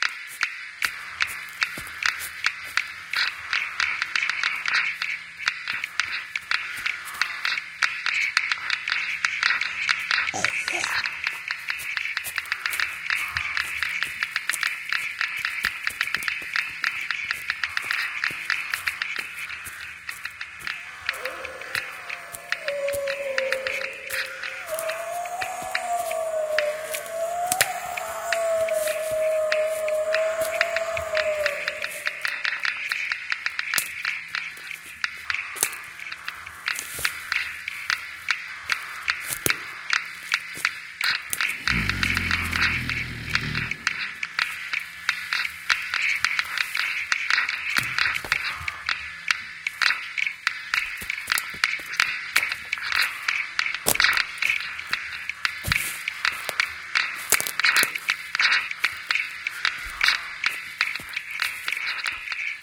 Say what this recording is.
Used as an audio set piece in a zombie-infested forest maze in an amusement park.